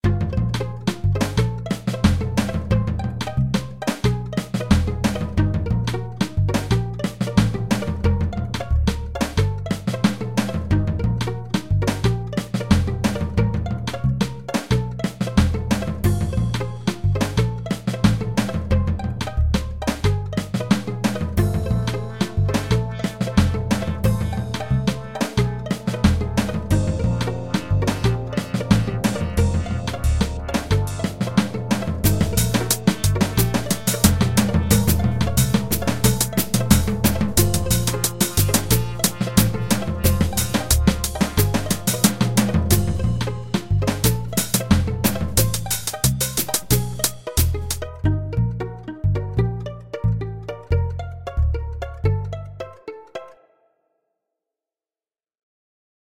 this shit is kinda groovy you should scat over it
sick, beatz, rap, screamo, cry, production, beats, hxc, death-metal, synth-punk, no-shame, beat